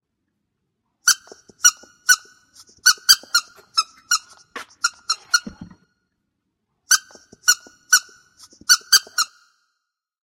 Mouse squeak
Used a dog toy and amplified its squeak with GarageBand to make it sound like a sort of mouse.